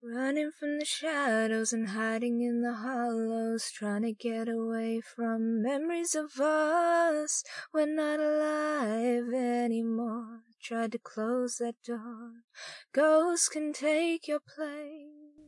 The exact same as the other vocals (the chorus with a spooky edge) except cleaned WITHOUT reverb (by Erokia).
lyrics, vocal, voice